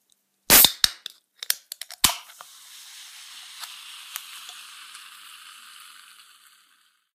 coke can open 1
Clean recordings of opening cans of Coke recorded with a 5th-gen iPod touch.
As I remember rightly this is a can of Diet Coke- perhaps held a bit too close to the mic...
pop, open